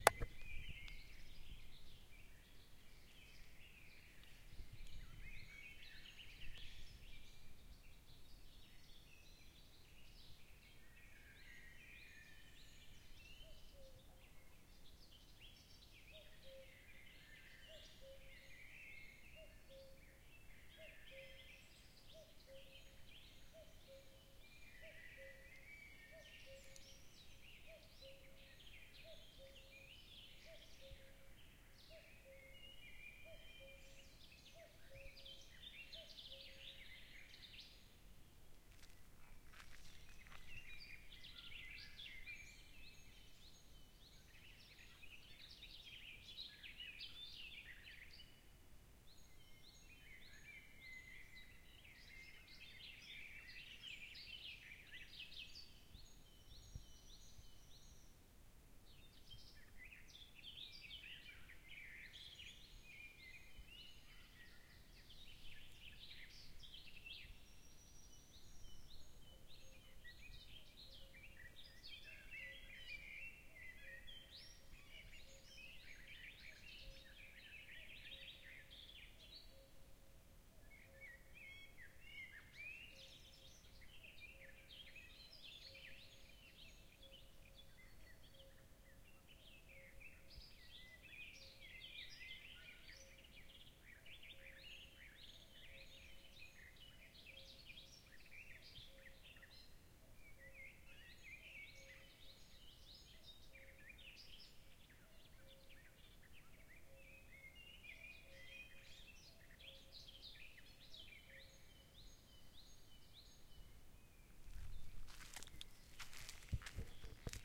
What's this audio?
Lintuja ja käki
A cockoo and some other birds early one summer morning in a forest in Finland.
forest, morning, summer, birds